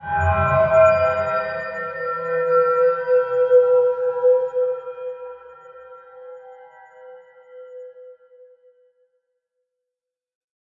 Asoada eerie movement creaking waterphone
A shiny and eerie sounding abstract movement, reminiscent of a waterphone or wine glass sound. Large space.
Original sample made using an acoustic guitar as a resonator for an electric bass, fed into a granular synthesis module add fiddling with its feedback value. Recorded using a Zoom H4n. Processing includes granular effects and reverb.
Recorded for my personal A Sound A Day challenge (Asoada).
eerie, horror, shiny, waterphone